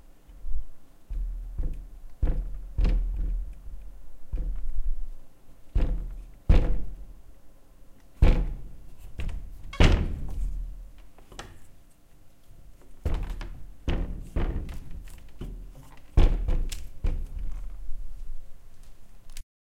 Making noises with a wooden closet, some bassy sounds as well. Recorded in Stereo (XY) with Rode NT4 in Zoom H4.